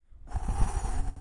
DEMIN-EYMARD celine 2015 2016 souffle
Breath : Recording of a very fast expiration, modified to make a lighter and more fluid breath fonndu at opening and at closure, to change the envelope of the sound three places to return the lighter and longer breath
Breath, Furniture, Roll, Wind